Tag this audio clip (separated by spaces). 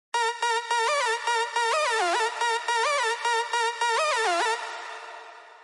Hardstylez Rave